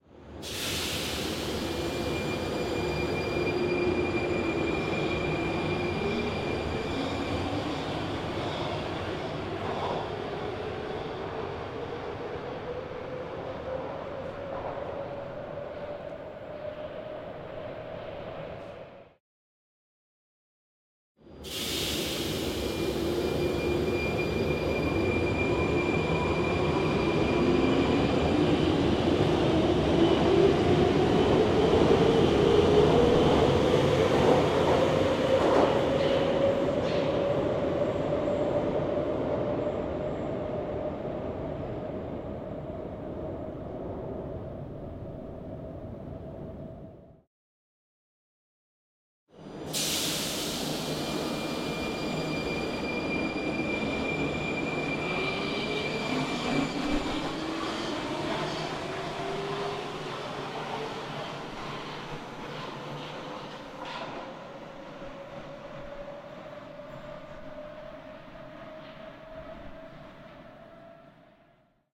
Three recordings of a train leaving an underground station.